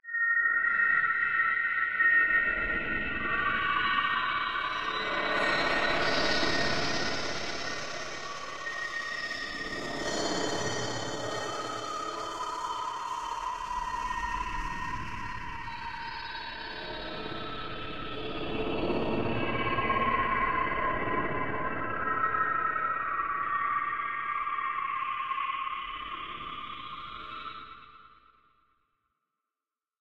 Space Predator
Heavily processed VST synth sounds using various reverbs, tremolo and LFO sweeps.
Alien, Creepy, Scary, Sci-Fi, Space, Spooky, Strange, VST